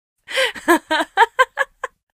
Authentic Acting of Laughter! Check out our whole pack :D
Recorded with Stereo Zoom H6 Acting in studio conditions Enjoy!